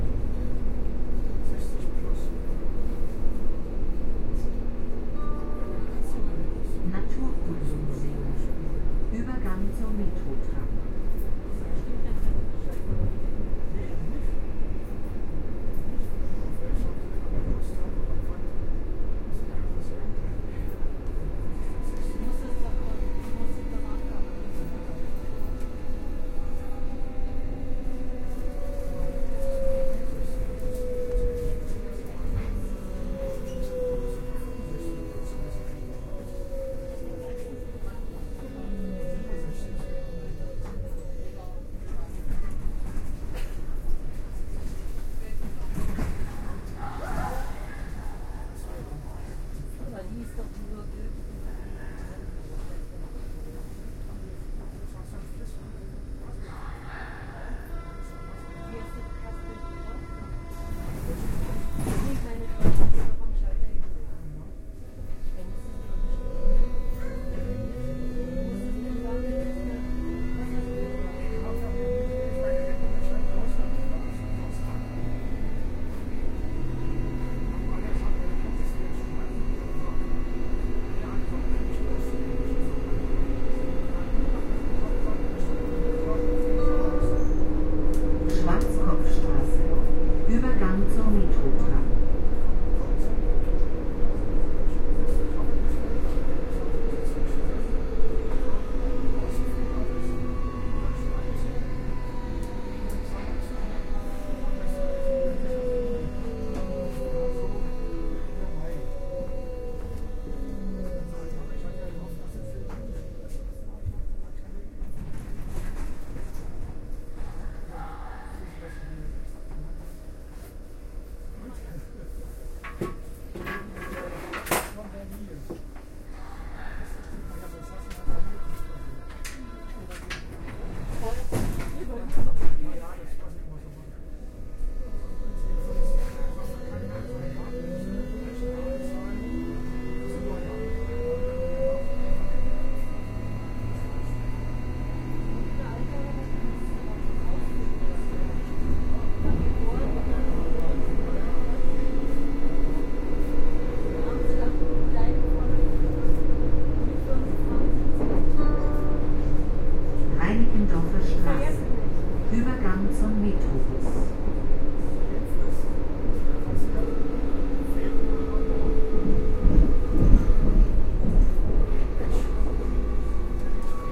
On board the U6 travelling towards Tegel. The usual sounds, recorded with the build in microphones of an R-09HR recorder.